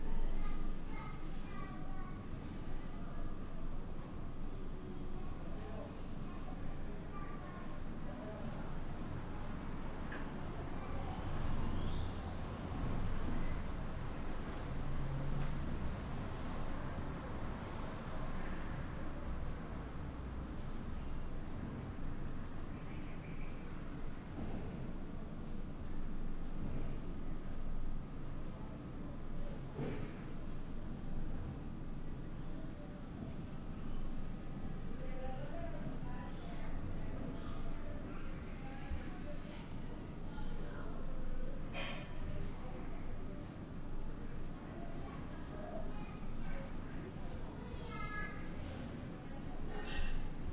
indoors house ambient room tone distant neighbours 2
ambient, distant, house, indoors, neighbours, room, tone